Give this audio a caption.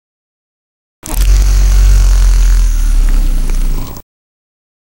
Toy Elephant
A vibrating toy with a deep bass hum. Recorded with M-Audio Microtrak II.
toy, unusual, vibrating, vibration